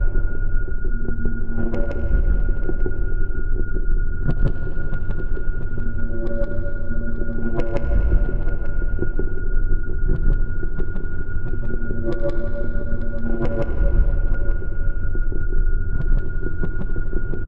a suspended texture with some rhythmical elements